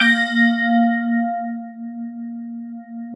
Made up by layering 3 additive synthesized spectrum sounds ran them through several stages of different audio DSP configurations. FL Studio 20.8 used in the process.
bell, bells, chime, church-bell, clang, clanging, dong, gong, metal, metallic, natural, organic, ring, ringing, synth, synthesis